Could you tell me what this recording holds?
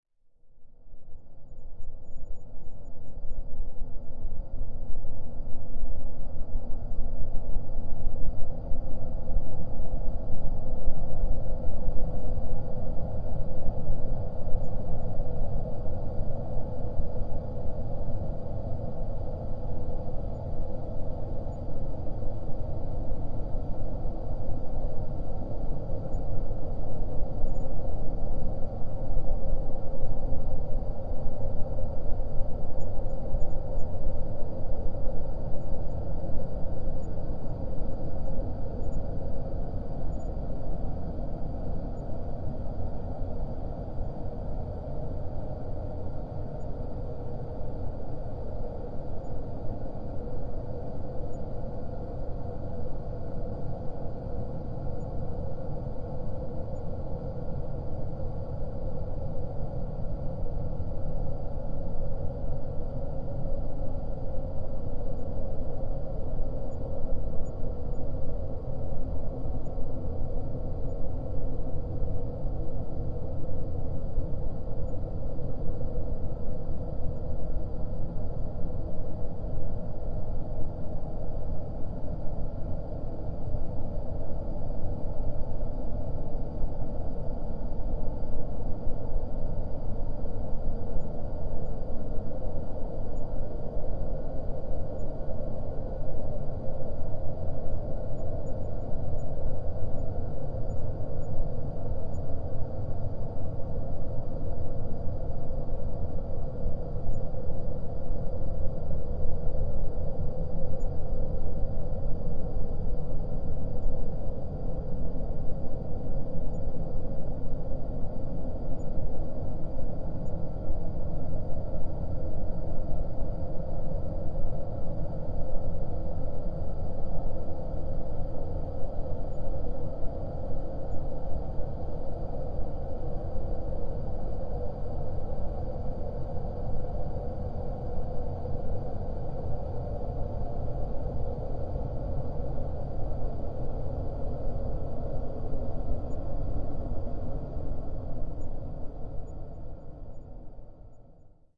Dungeon Air
A sound for when there is no sound, just air flowing. Made with large interiors in mind. Recorded an empty room, then stretched the audio, added reverb, and increased bass.
background, interior